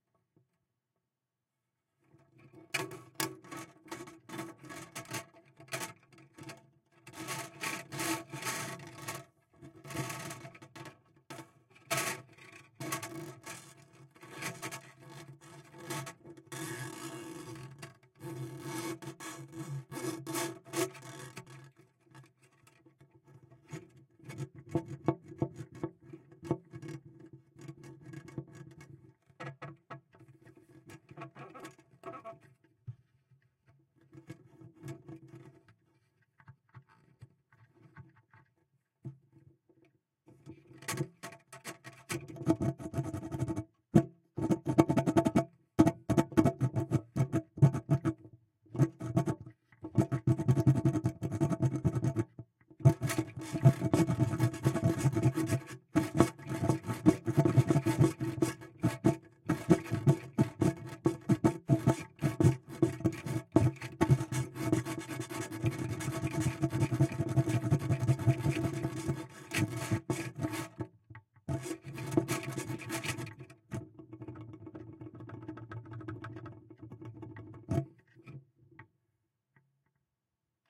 delphis BASS STRING 2
Selfmade tools where the microphone is placed into it. Mics Studio Projects S4 and RAMSA S1 (Panasonic). Record direct into Cubase4 with vst3 GATE, COMPRESSOR and LIMITER. Samples are not edit. Used pvc pipes, guitar strings, balloon, rubber, spring etc.
c4, delphi, pipe, pipes, rubber, s1, spring, string